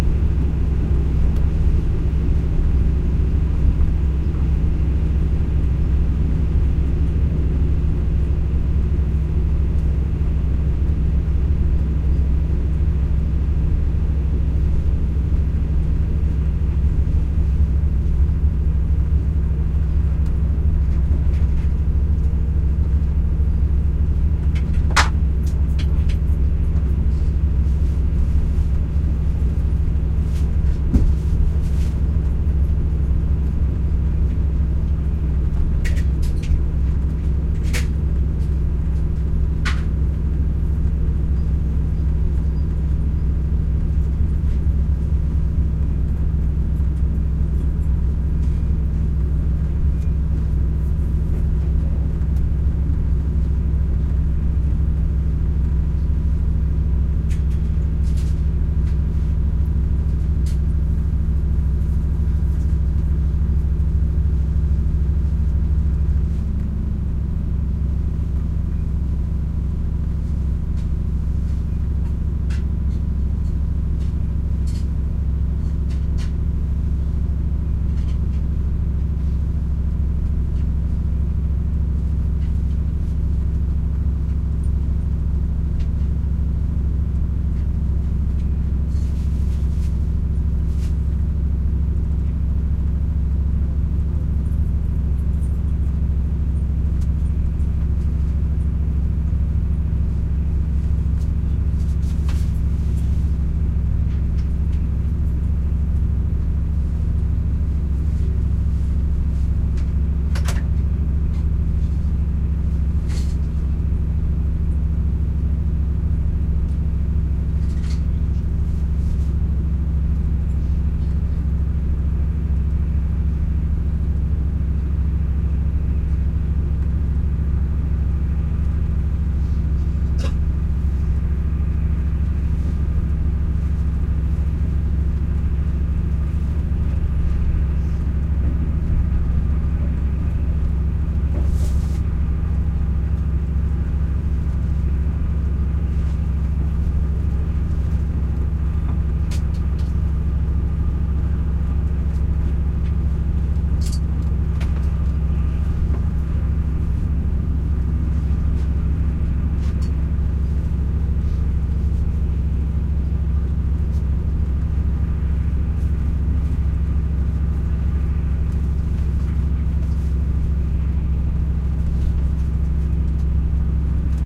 Thailand passenger train 1st class cabin roomtone train moving lower bunk